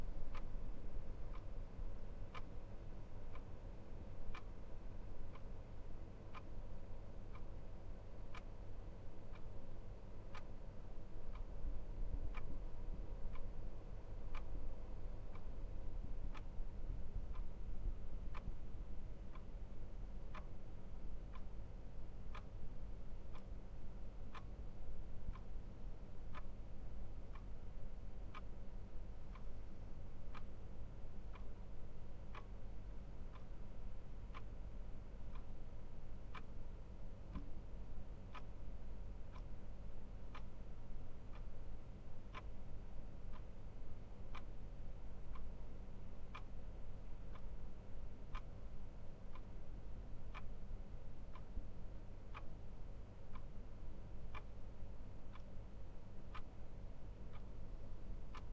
An office wall clock ticking.
foley, Clock, time, tick-tock, soundfx, wall-clock, tick, tock